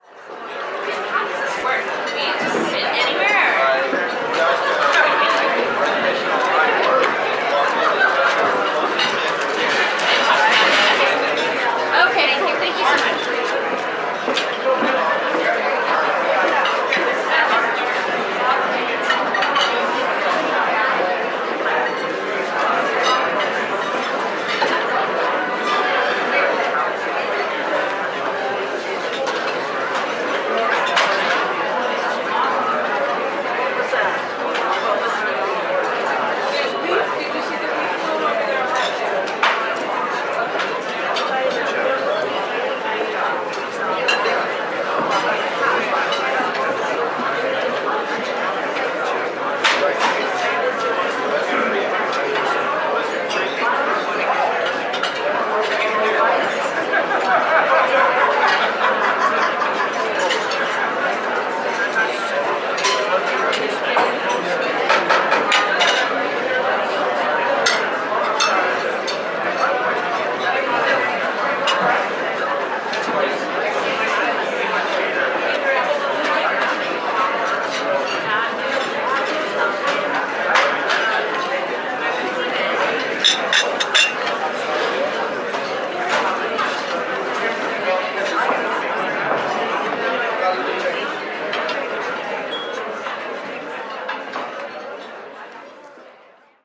Cafe crowd
This is a field recording inside a buffet style eatery.
4/2017 San Francisco Ca. USA
cafe, field-recording, crowd, plates, voices, restaurant, people, lunch, dining, talking, cafeteria, chatter, ambience